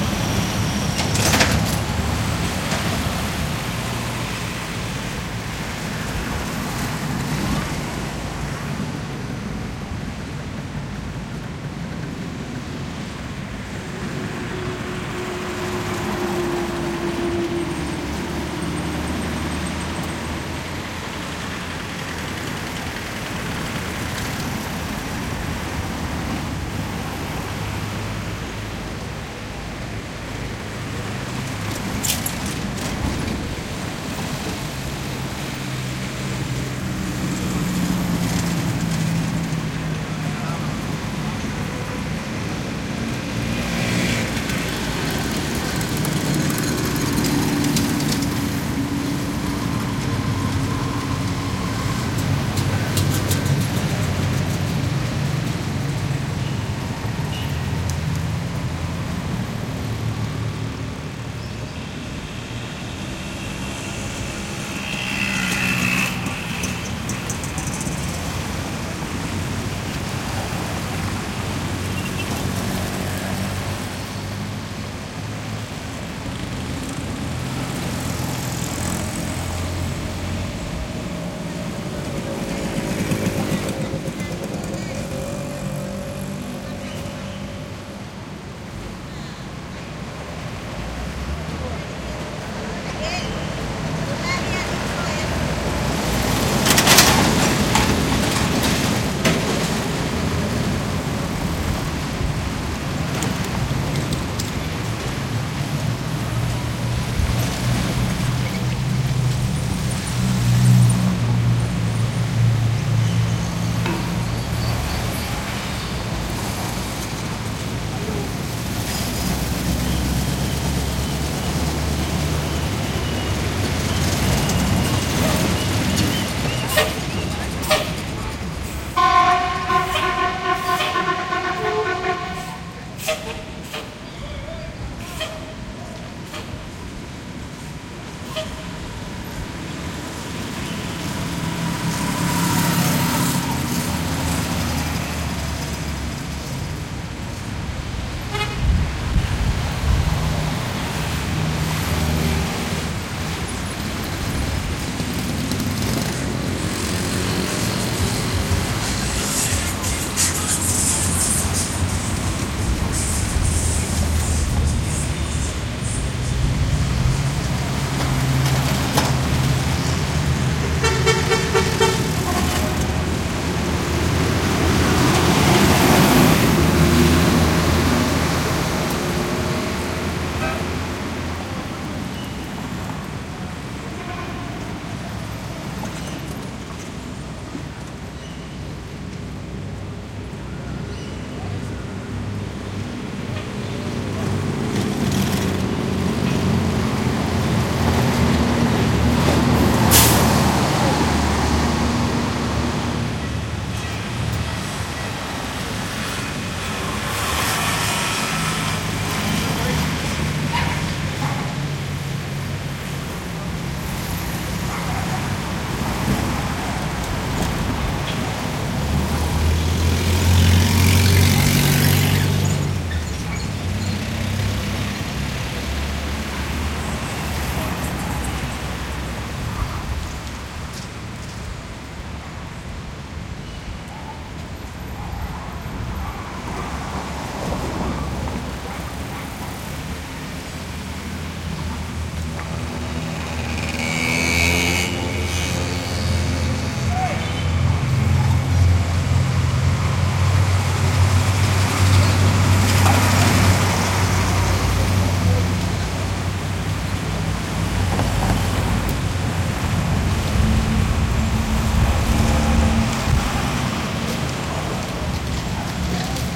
traffic along big boulevard in Havana